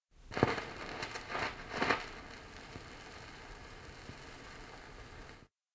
Vinyl start crackle 3
The slight crackle of the pickup needle being lowered onto the vinyl record surface.
Recorded in stereo on a Zoom H1 handheld recorder, originally for a short film I was making. The record player is a Dual 505-2 Belt Drive.
crackle, dusty-vinyl, gramophone, gramophone-noise, knaster, LP, phonograph, pop, record-player, start-crackle, surface-noise, turntable, vinyl, vinyl-record-player